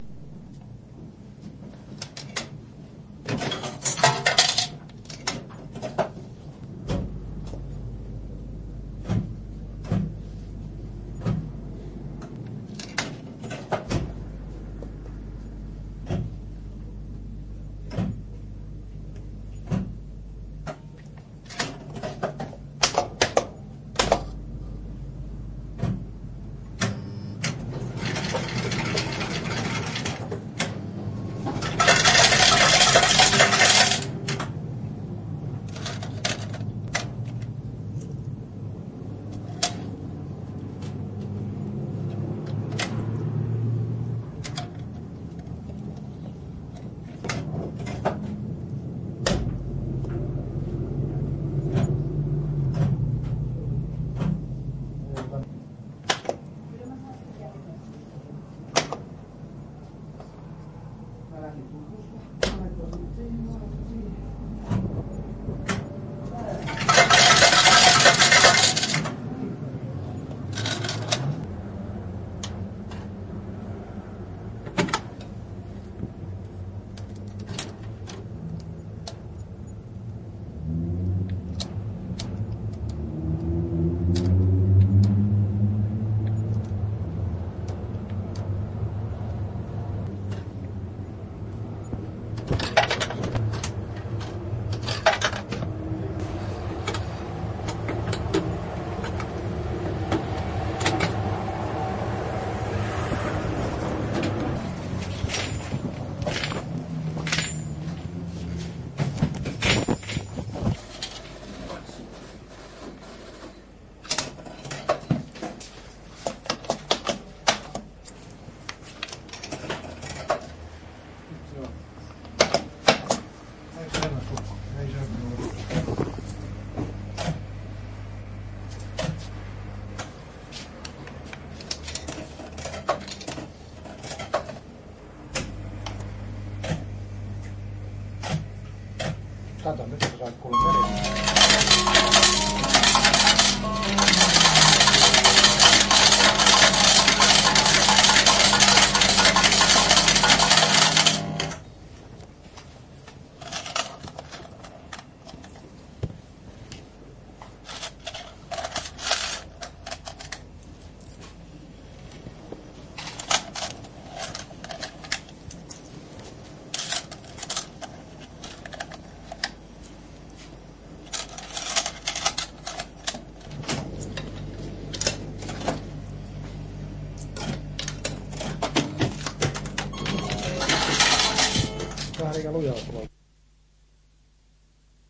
Sound effect of a old slotmachine (fruit machine) from 1989. Playing, some lockings, finnish speech in backround ("you won forty" and "you hit too hard") wins forty finnish marks. Old c-cassette recording, used dolby B when recorded and re-recorded to audacity. All speech that is possible was removed during the edit process
1989, c-casette-recording, dolby-b-noise-removal, field-recording, lockings, slotmachine, wins